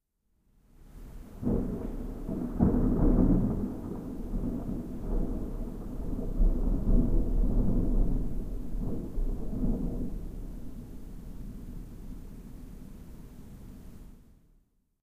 One of the 14 thunder that were recorded one night during my sleep as I switched on my Edirol-R09 when I went to bed. This one is quiet close. The other sound is the usual urban noise at night or early in the morning and the continuously pumping waterpumps in the pumping station next to my house.
bed, body, breath, field-recording, human, rain, thunder, thunderstorm